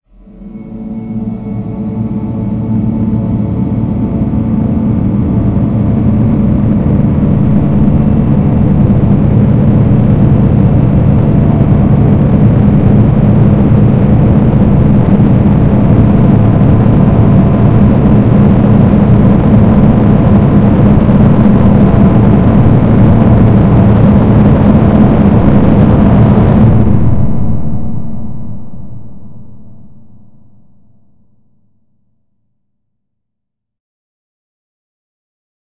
jf Gome Drum
An ambient orchestral drone, builds to a vista.